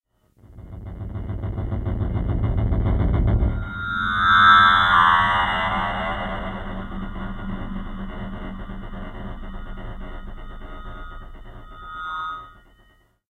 pulsar synthesis 03
Sample generated with pulsar synthesis. Begins with a low-pitched rumble which quickly changes to a FM-like sweep that fades out.
noise, drone